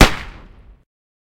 Gun Sound 3
gun, sound